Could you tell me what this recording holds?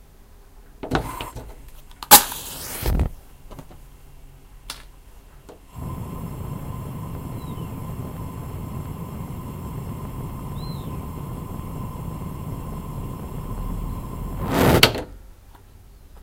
striking a match. starting a gas burner. lighting it up. setting it at maximum power. putting it out. a bird whistles through the chimney. recorded with a minidisc, stereo mic and portable preamp.
competition, fire